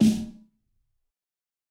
Fat Snare of GOD high tune 021
Fatter version of the snare. This is a mix of various snares. Type of sample: Realistic
drum, god, fat, tune, snare, realistic, high